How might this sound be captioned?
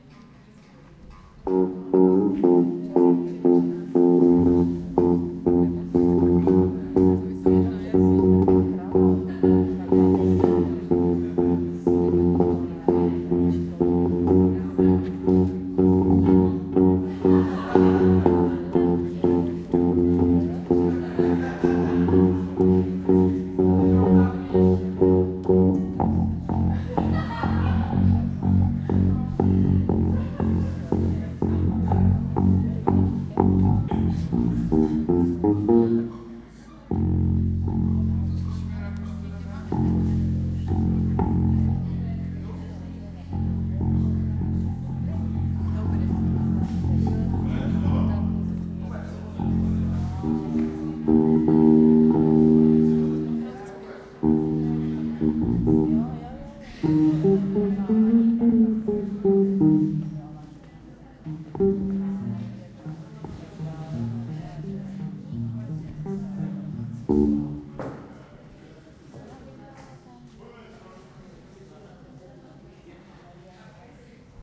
Man is playing something on a bassguitar before the performance. Recording shows the atmosphere, people are laughing and talking. Recorded before music contest in Ostrava. Czech republic. Equipment: Zoom H1, normalized to 0 DB.